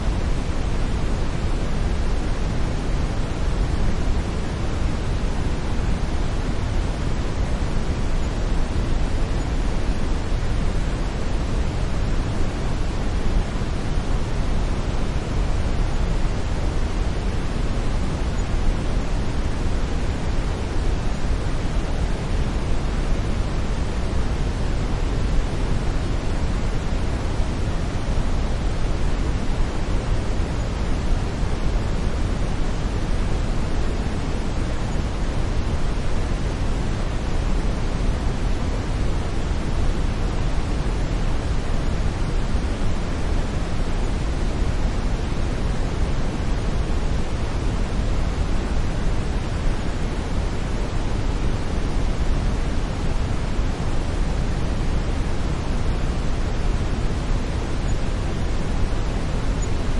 Pine Tree Tops – Silence, Ambiance, Air, Tone, Buzz, Noise
This is a series of sounds created using brown or Brownian noise to generate 'silence' that can be put into the background of videos (or other media). The names are just descriptive to differentiate them and don’t include any added sounds. If the sound of one is close, then try others in the pack.